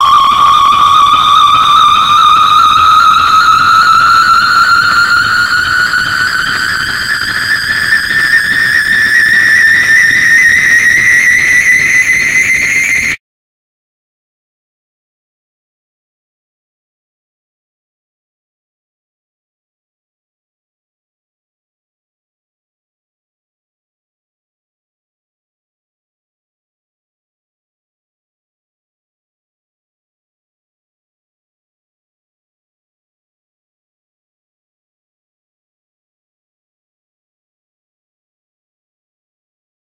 schrei steigend + brutal
Long and distorted scream
loud, noise, scream